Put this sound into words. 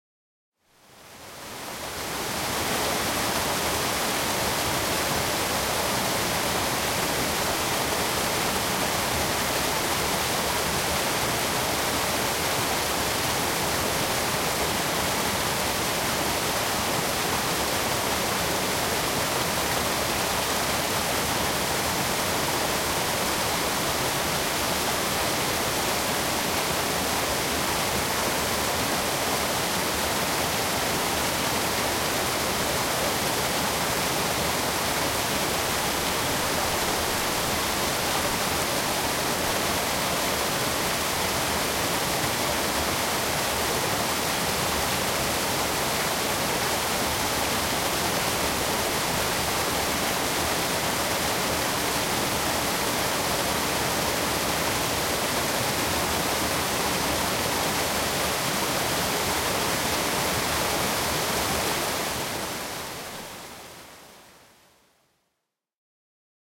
Waterfall Bahamas
Recorded with the ZOOM H2 at the Atlantis resort in the Bahamas. No EQ.